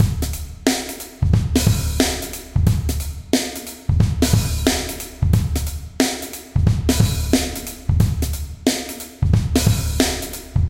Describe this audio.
Funk Shuffle C
Funk Shuffle 90BPM
beat, drum, funk, shuffle, swing